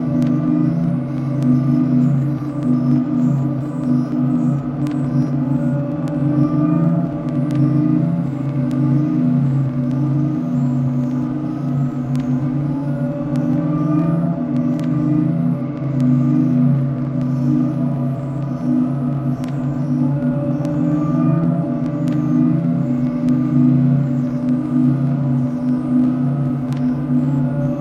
One in a small series of sounds that began as me making vocal sounds into a mic and making lots of layers and pitching and slowing and speeding the layers. In some of the sounds there are some glitchy rhythmic elements as well. Recorded with an AT2020 mic into an Apogee Duet and manipulated with Gleetchlab.
creepy, dark, echo, eerie, glitch, singing, spooky, vocal, voice